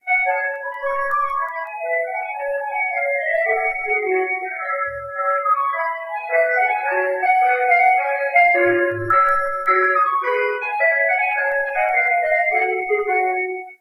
Ice cream truck passing between building. Based on #20795 by djgriffin, but with the following additions: background noise suppressed, overall tone levelled out, EQed (some), make loopable (not perfect as the whole song wasn't present in the original).